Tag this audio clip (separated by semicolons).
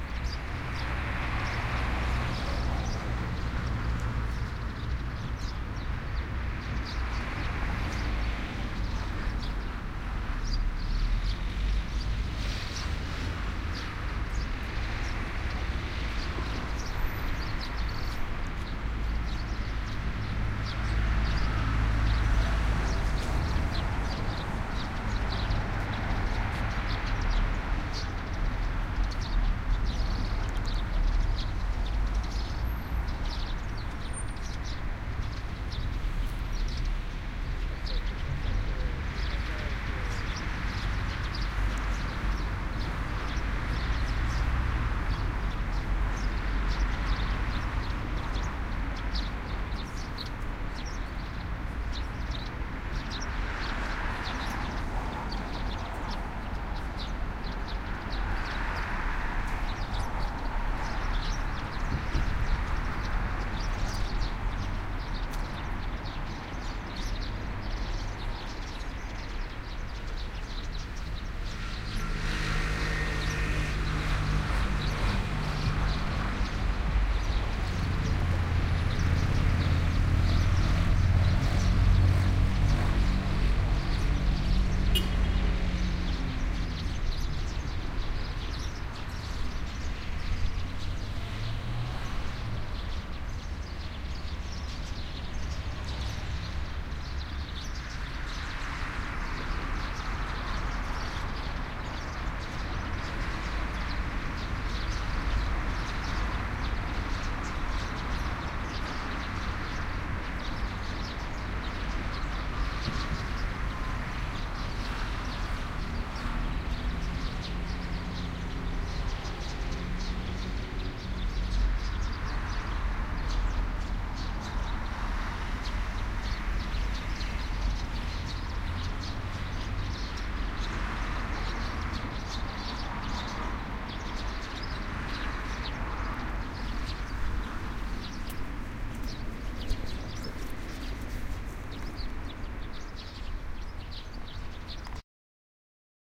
ambience; street; binaural; birds; city; street-noise; ambient; field-recording; traffic